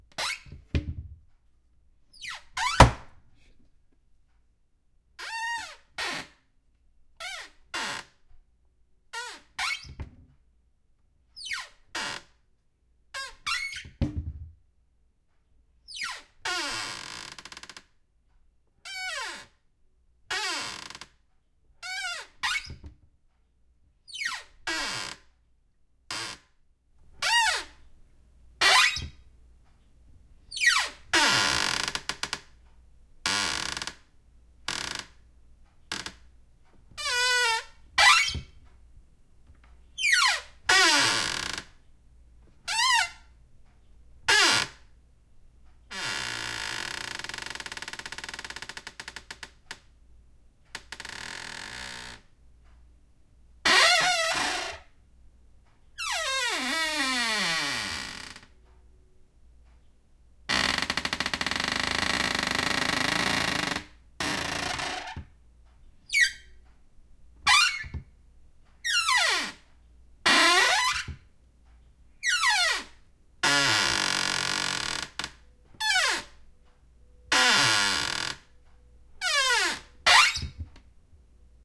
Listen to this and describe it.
cupboard door wood open close creak fast and slow many
close, slow, creak, cupboard, door, wood, open, fast